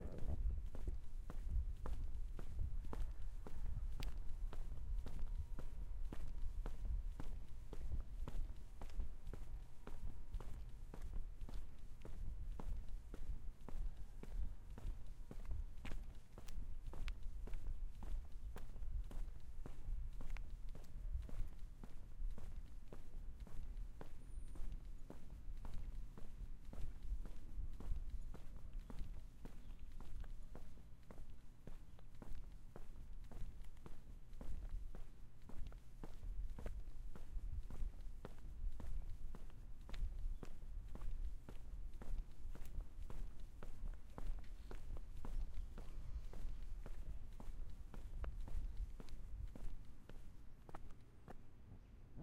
FX Footsteps Outside Pavement01
footstep, foot, shoe, foley, walk, walking, steps, step, footsteps, feet